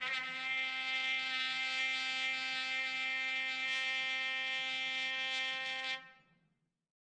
One-shot from Versilian Studios Chamber Orchestra 2: Community Edition sampling project.
Instrument family: Brass
Instrument: Trumpet
Articulation: straight mute sustain
Note: C4
Midi note: 60
Midi velocity (center): 95
Room type: Large Auditorium
Microphone: 2x Rode NT1-A spaced pair, mixed close mics
Performer: Sam Hebert
c4, single-note, straight-mute-sustain, midi-velocity-95, vsco-2, multisample, trumpet, midi-note-60, brass